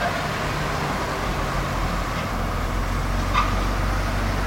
psikedelic short
Traffic noise to use in drum machines